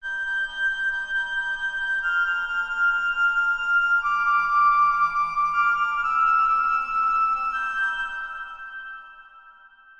FORF Main Theme Orchestral 01
cinematic, epic, orchestral, soundtrack, trailer